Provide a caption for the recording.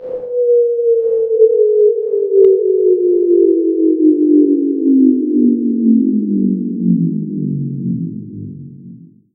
Spindown Huge
A sound i made in Audacity, basicly a Chirp going down from about 500 Hz to 20 Hz (with a fade-out to limit subwoofer use)and added some ambience by using white noise rumble on the background, also added a slight Wah-Wah as you might notice.
echo, shutdown, turning